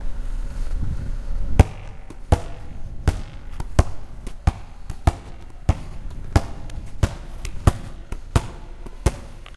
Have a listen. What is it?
Sonic Snap Mahdi
Field recording from 'De Piramide' school, Ghent and it's surroundings, made by the students of the 5th grade.
Sonic-Snap, Piramide-Ghent